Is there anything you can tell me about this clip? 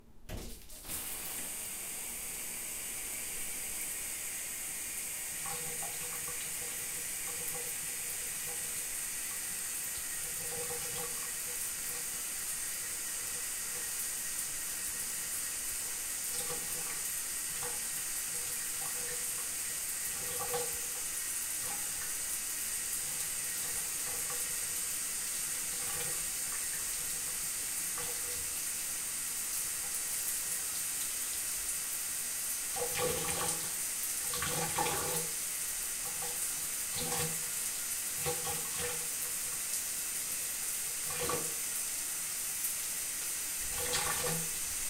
SHOWER AMBIENCE WATER 01
A shower in a dorm recorded with a Tascam DR-40
water, ambience, shower